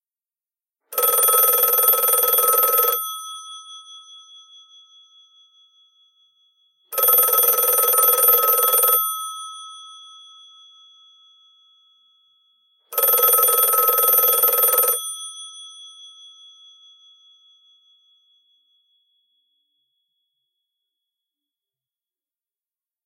telephone ring
A telephone bell rings three times. Recorded with a Sennheiser ME-66 through an RNP8380 preamp and RNC1773 compressor into Pro Tools. Background noise reduction with Waves Z-Noise.
bell, phone, ring, telephone, tone